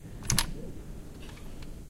008 door opening
This sound is a recording of a door opening.
It was recorded using a Zoom H4 recording device at the UPF campus in the 003 aula from tallers for the Sound Creation Lab.